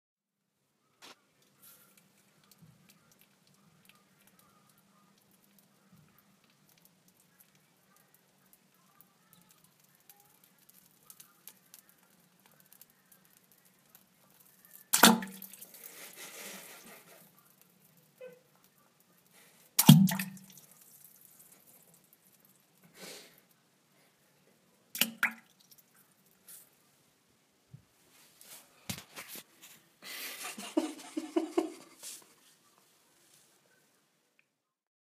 someone is taking a big shit